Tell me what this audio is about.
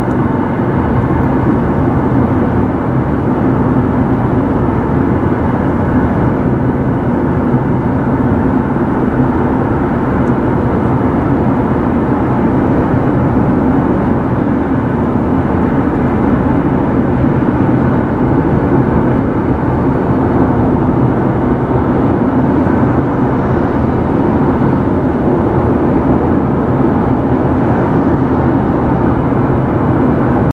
Driving back from Hereford on the M42 motorway, somewhere between Warwickshire and Leicestershire, UK. Geotag is somewhat approximate because I was moving at the time.